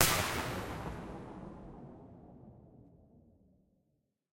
This is a synthetic impulse response designed to sound somewhat like what you might hear in the woods. It could sound kind of scary if convolved against wolf or werewolf sounds. Without convolution, of course, it sounds more like a gun being fired maybe 50 feet away in the woods. Note that this is not a recording. It was created in Cool Edit Pro. I like it.
forest, scary, impulse-response